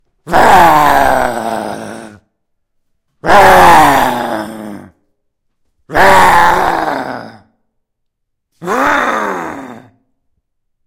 Angry Man
I burnt my food today. Then there was annoying music in the courtyard. ARRRGH!
Recorded with Zoom H2. Edited with Audacity.
ARGH, grumpy, hating, male, roar, shout, violence